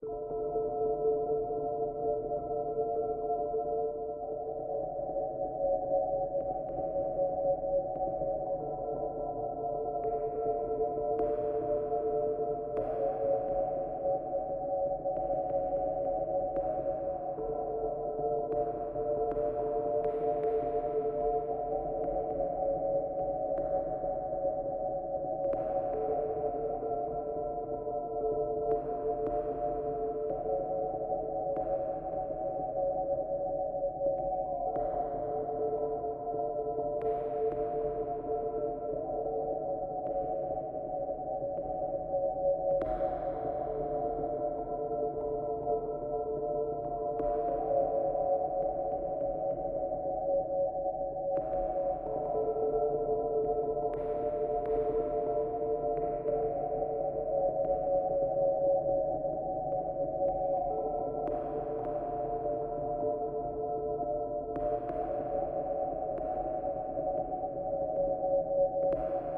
a dark Melodie/sphere ambient stuff made with synth, processed etc.
ambient, dark, loop